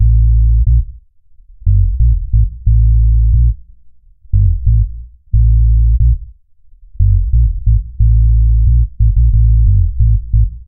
Zulu 90 C Dub Bassline
Roots rasta reggae
rasta, Roots, reggae